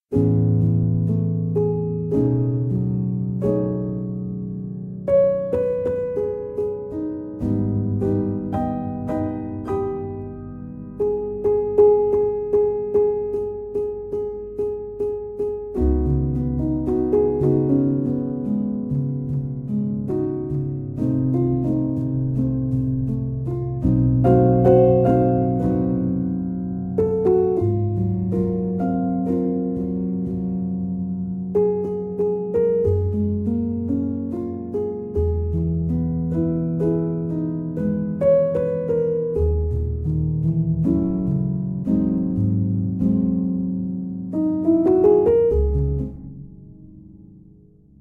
Late Jazz Piano
Ambient, Bar, Cinematic, Felt, Film, Jazz, Late, Movie, Music, Night, Pianist, Piano, Recording, Sample, Slow, Sound